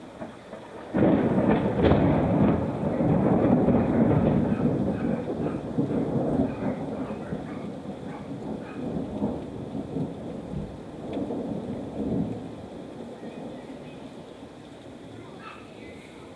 A recording of a thunderstrike I got while sitting outside on the porch.